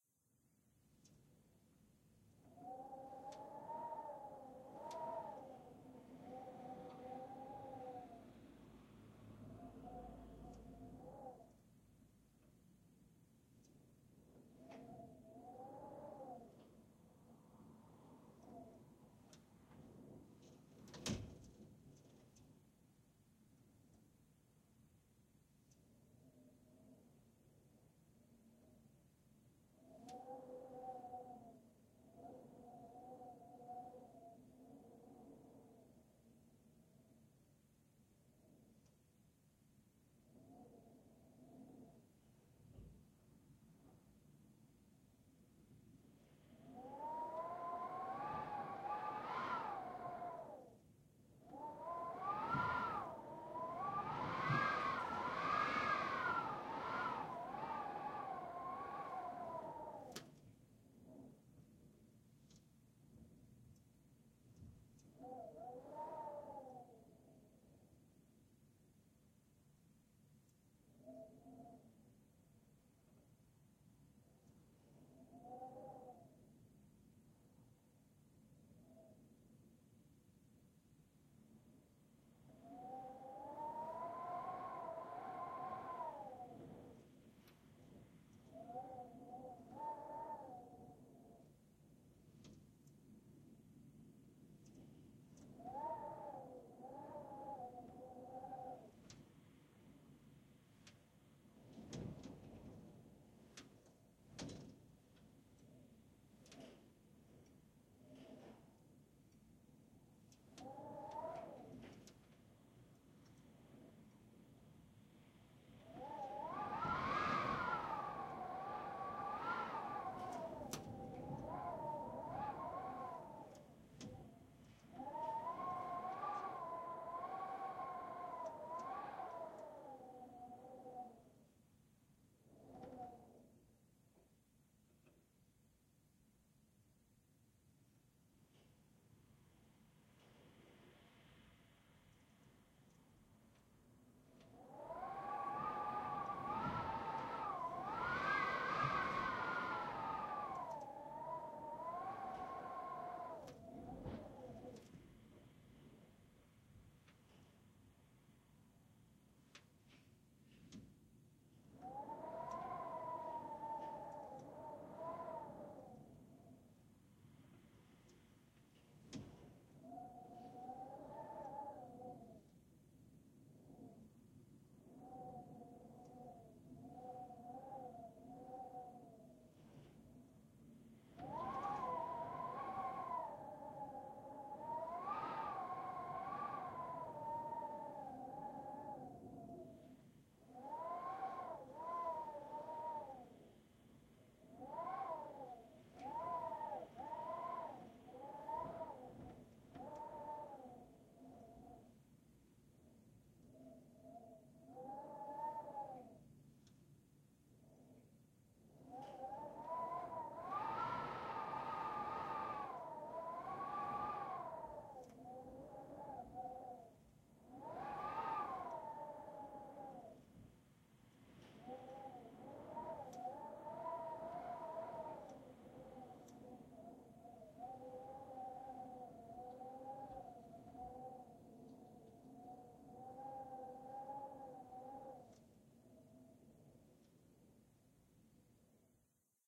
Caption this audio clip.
This is the sound of cold winter wind finding its way through the gaps in our living room window. The recording has been edited to remove long stretches of silence, and has had some light noise reduction applied. The noise floor is still quite high though, so maybe best to put this one fairly low in the mix. Recorded using a Zoom H2 with its rear mics activated.